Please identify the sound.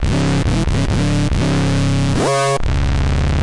140 Wub Grubsynth 01
drums, filter, free, guitar, loops, sounds